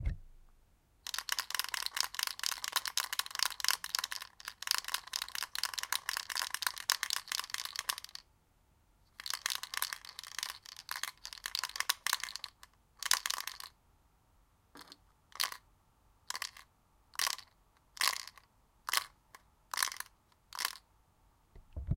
Tic Tac sound fast
Playing with the last tic-tac from the box at a fast pace
playing, box, fast, plastic, tic-tac